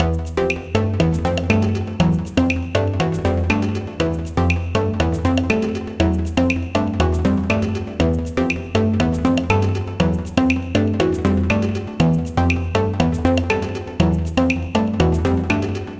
lead perc loop 7001028 120bpm
120bpm, perc, lead